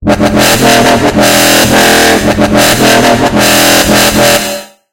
A bassline I threw together in FL studios mobile. Made with GMSynth, and was resembled and chopped up. Enjoy!